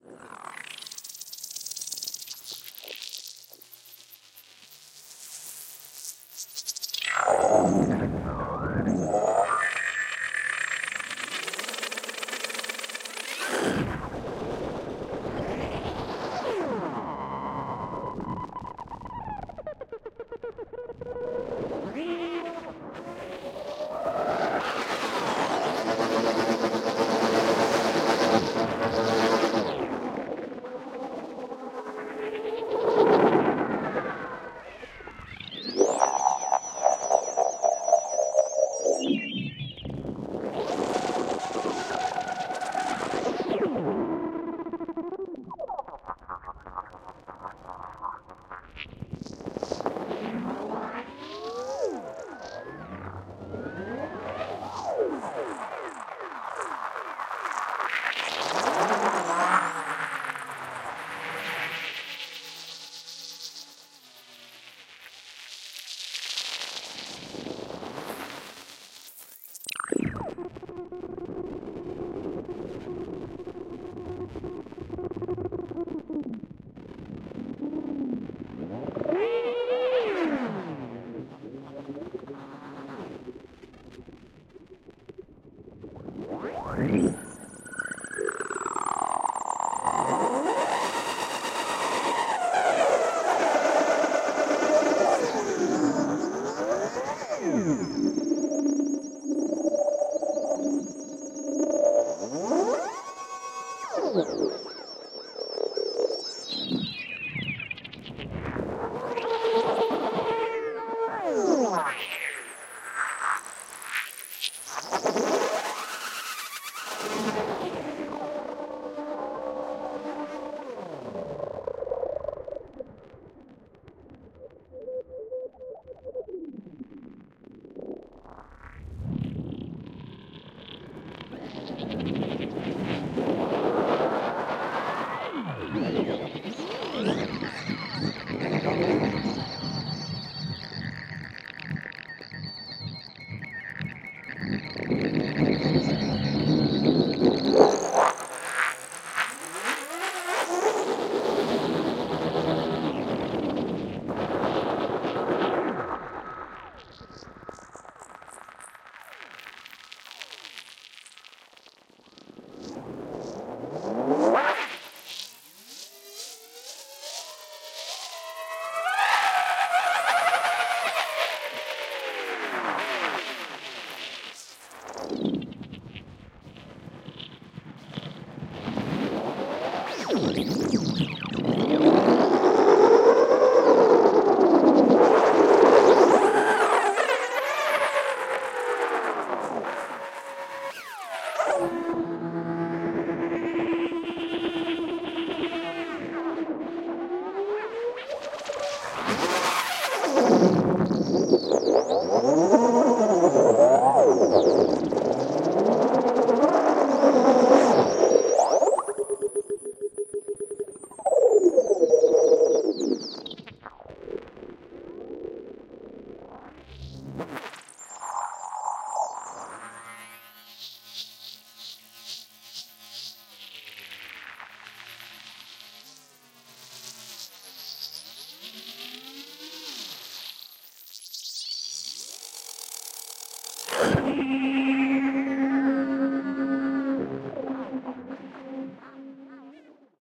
ESERBEZE Granular scape 37
16.This sample is part of the "ESERBEZE Granular scape pack 3" sample pack. 4 minutes of weird granular space ambiance. Noisy metallic interference from Jupiter.